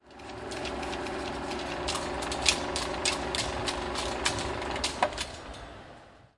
17 - End of tape
End of the tape on a 16mm projector - Brand: Eiki
Final de la cinta en proyector de 16mm - Marca: Eiki